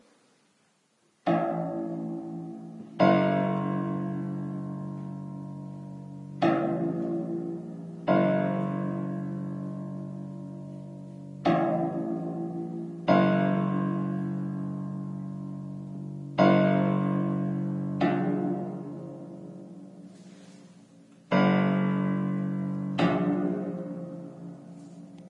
piano false 2
old dissonant piano recorded in decaying castle in Czech republic
dissonance, piano, out